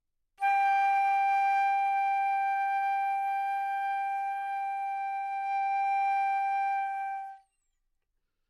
overall quality of single note - flute - G5
Part of the Good-sounds dataset of monophonic instrumental sounds.
instrument::flute
note::G
octave::5
midi note::67
good-sounds-id::502
Intentionally played as an example of bad-dynamics
good-sounds, G5, single-note, neumann-U87